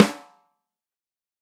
MPM14x5½ M201 VELO9
For each microphone choice there are nine velocity layers. The microphones used were an AKG D202, an Audio Technica ATM250, an Audix D6, a Beyer Dynamic M201, an Electrovoice ND868, an Electrovoice RE20, a Josephson E22, a Lawson FET47, a Shure SM57 and a Shure SM7B. The final microphone was the Josephson C720, a remarkable microphone of which only twenty were made to mark the Josephson company's 20th anniversary. Placement of mic varied according to sensitivity and polar pattern. Preamps used were Amek throughout and all sources were recorded directly to Pro Tools through Frontier Design Group and Digidesign converters. Final editing and processing was carried out in Cool Edit Pro.
snare, dynamic, velocity, sample, drum, mapex, m201, beyer, pro-m, 14x5, multi